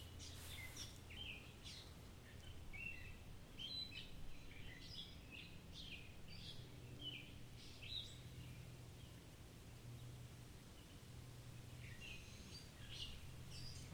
A nice, but short, recording of birds chirping in my front yard.